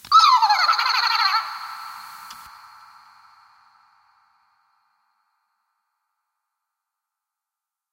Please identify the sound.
Weird laugh
recording with my PRO-TECH mic.
progrem cubase 5.2.
Childhood Toy
When you shake it
He makes evil laugh for my opinion :-)
A lot reverb,hige pass filter.
fictitious, FX, noise, sound